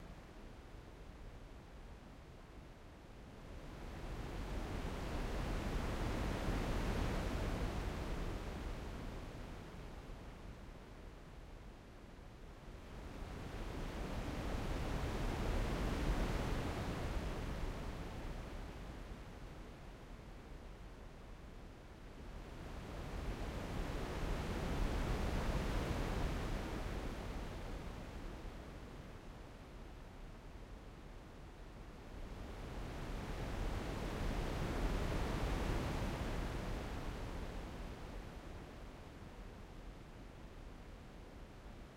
Ocean Waves 3
A sample that sounds like waves crashing on a beach. I created this using FabFilter Twin 2 after a session exploring the different XLFO's and filters of this amazing Synth.